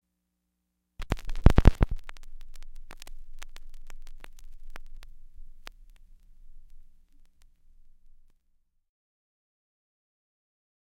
45rpm needle drop
Putting the stylus onto a 45 rpm record
effect, phonograph, pops, surface-noise, vinyl